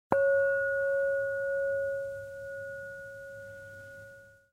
Tibetan bowl hit once, softly. EM172 Matched Stereo Pair (Clippy XLR, by FEL Communications Ltd) into Sound Devices Mixpre-3.